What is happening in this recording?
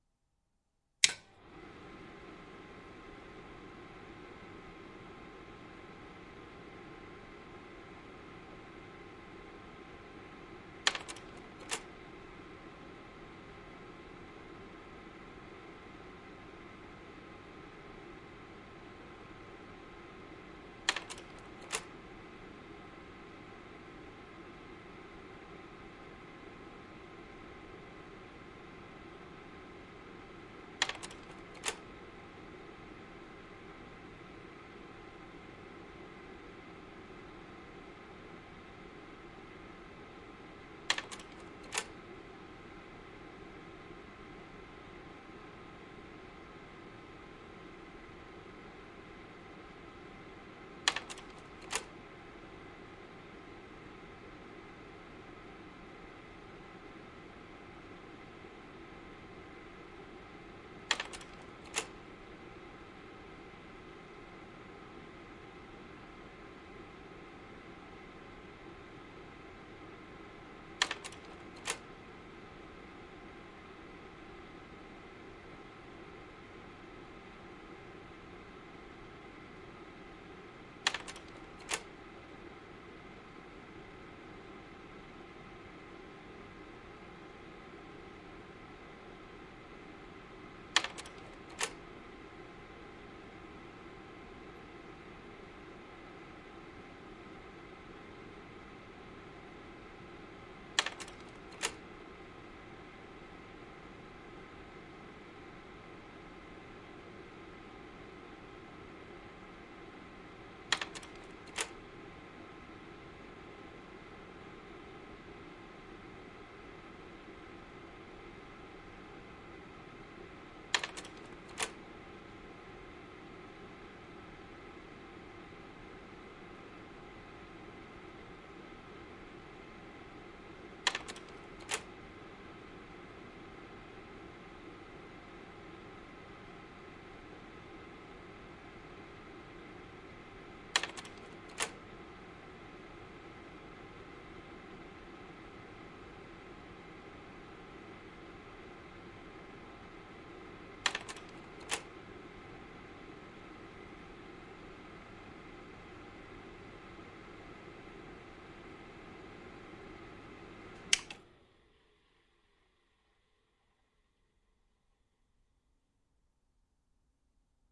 Slide projector #1
Elmo Omnigraphic 253AF slide projector, similar to a Kodak S-AV 2000. Turn on, slide change at 10 second intervals, turn off. Recorded on a Marantz PMD 661 with a Rode NT4, 250 mm above centre rear of projector, angled down at 45º.
I needed this sound as part of an audio-visual that mimics a slide show. I chose to record a 10-second change interval (instead of a shorter interval) because that allows me to razor the waveform between changes, and then extend (or reduce) the time between changes. Too short a time interval between slide changes means you'd have to add motor/fan sound between every change.
carousel, Kodak, Slide-projector, slide-show